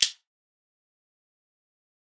Click 1(metal)
Single metal click.
metal, hit, click